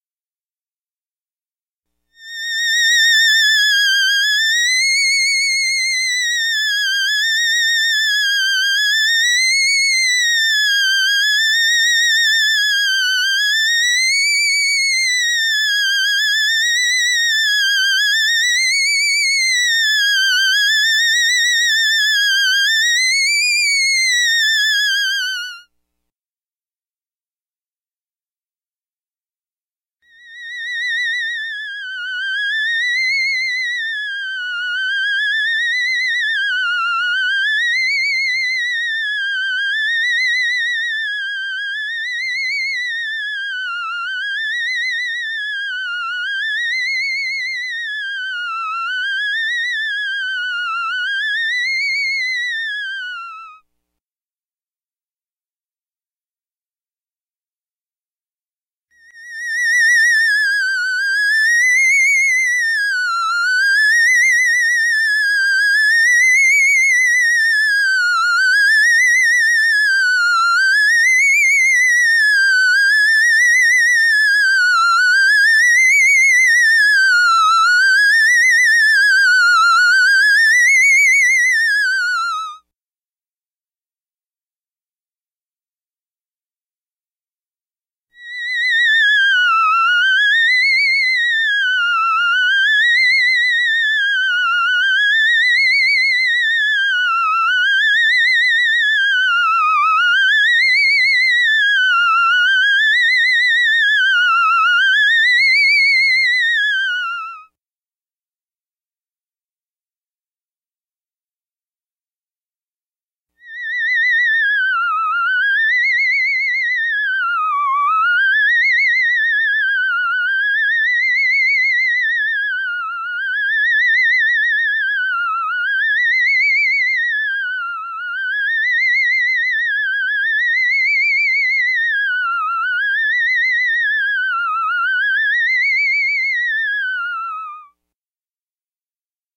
HypnoTones Hi B
File contains a second collection of 4 or 5 creepy, clichéd "hypno-tones" in the theremin's highest ranges, each separated with 5 seconds of silence. Each hypnotone in the file uses a different waveform/tonal setting to give you various textural choices.
As always, these sounds are recorded "dry" so that you can tweak and tweeze, add effects, overdub and mangle them any way you like.